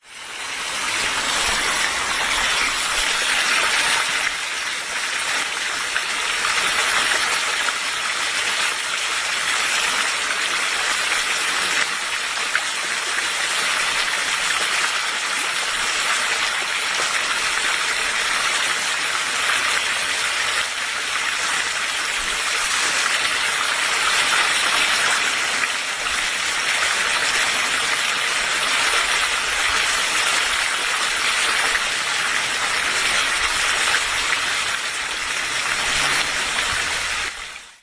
10.00 a.m. fountain with two frogs sculptures on Aleje Marcinkowskiego (Poznan, Poland).
processing: fade in/out
street, dripping, sandstone, water, poznan, marcinkowskiego, aleje, frog, fountain